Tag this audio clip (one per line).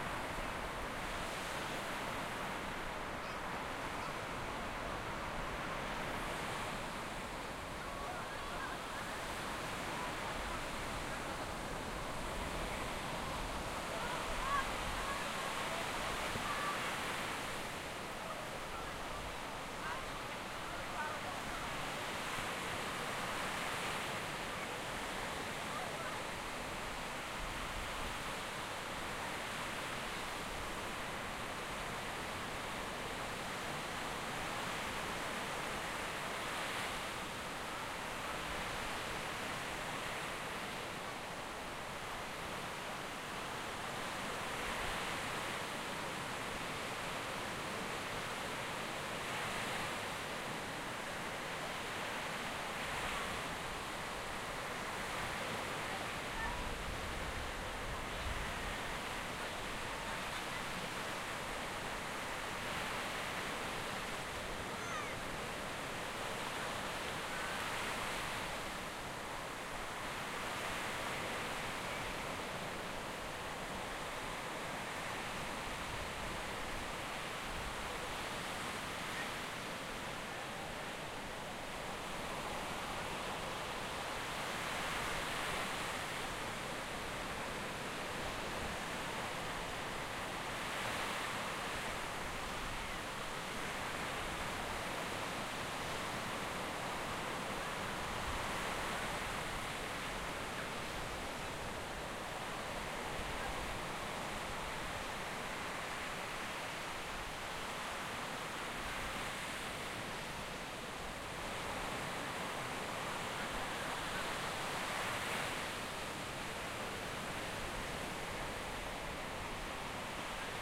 waves
Cornwall
beach
England
sea
atmosphere
soundscape
field-recording
nature
ambience
children-playing